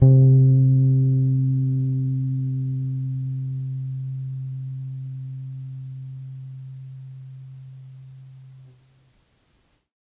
Tape Bass 13
Lo-fi tape samples at your disposal.